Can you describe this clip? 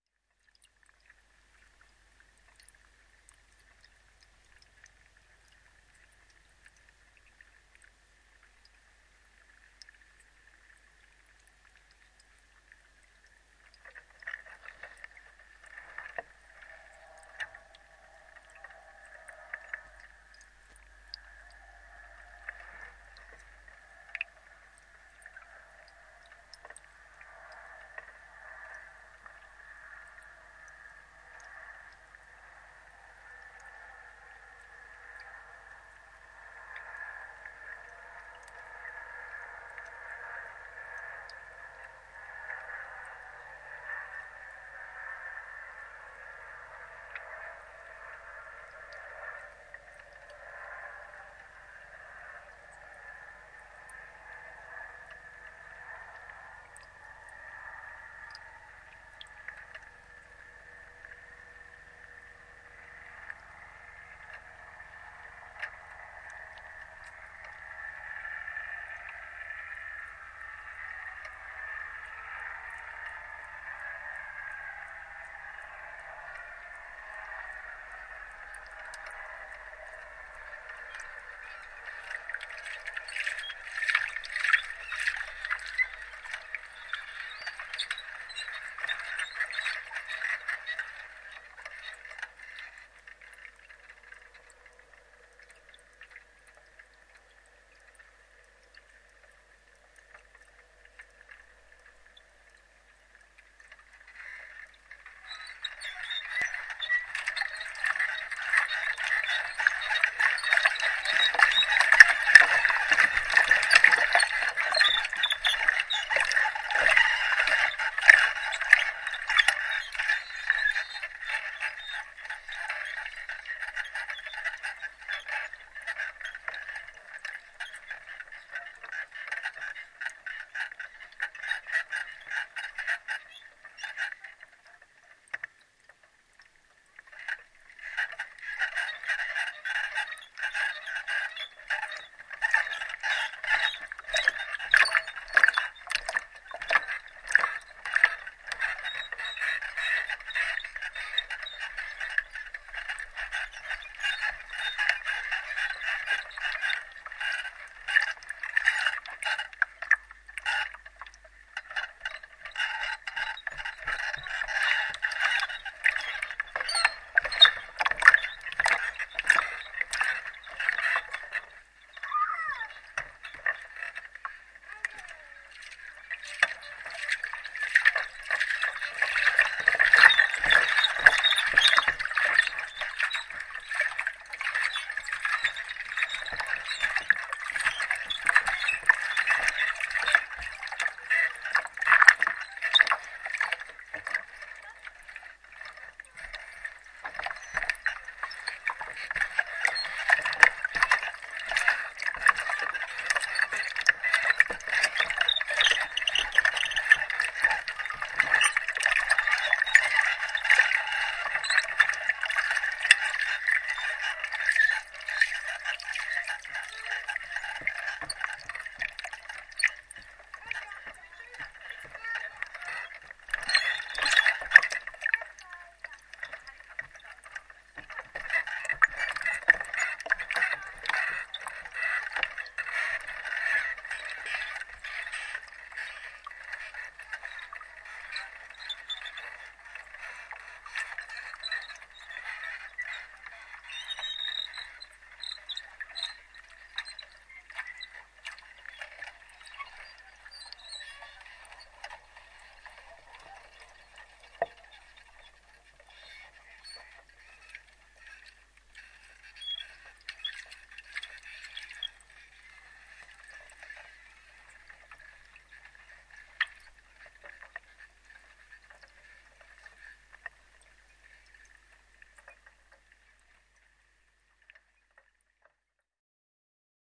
Hydrophone Newport Wetlands Helicopter Pontoon Movement
This is a collection of sounds gathered from the Newport Wetlands Nature Reserve in Newport, UK.
I had the chance to borrow a hydrophone microphone from a very generous and helpful friend of mine.
There is quite a bit of high frequency hissing where I had to boost the gain to get a decent signal, but on a few (I thought I had broken the microphone) you can also hear the power line hum. It was a surprise to hear!
lake, squeaks, underwater, hydrophone, submerged